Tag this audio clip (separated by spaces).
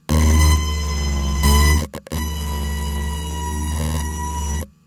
electro riser